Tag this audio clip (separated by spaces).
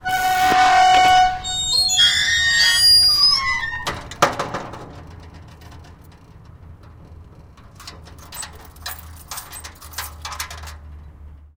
closed closing door gate gates industrial iron key keys lock locked locking old rusty squeak squeaks steampunk